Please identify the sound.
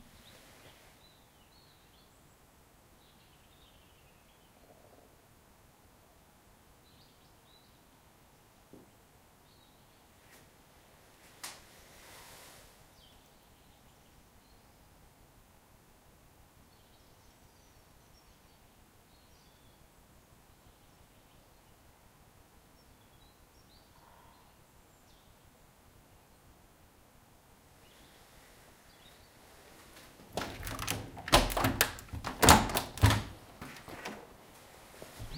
Birds in a wooded Moscow village, as I walk over a wooden bridge which sounds a bit like creaky floorboards.